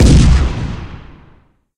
cannon boom7
big, boom, cannon, explosion, large